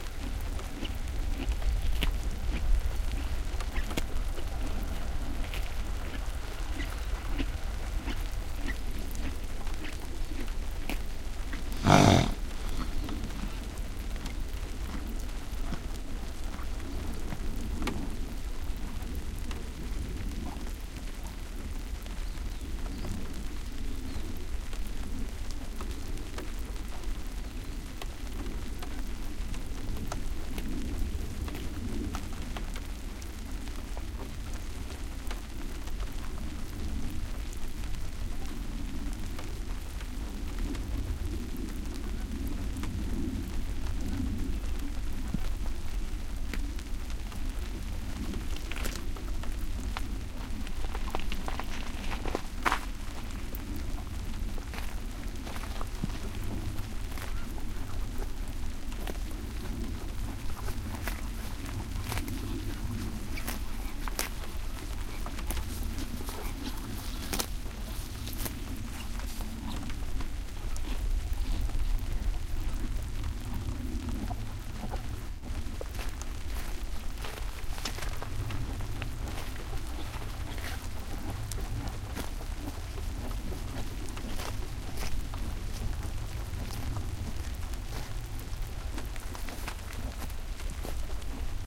noises made by a horse grazing. At 12s the horse snorts. Recorded near Centro de Visitantes Jose Antonio Valverde, Donana (S Spain) using a pair of Shure WL183 into Fel preamp, PCM M10 recorder